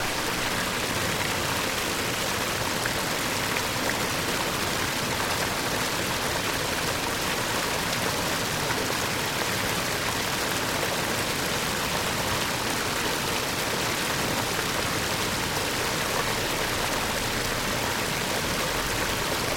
water canal river pour liquid rush rushing
water, rushing, pour, rush, liquid, river, canal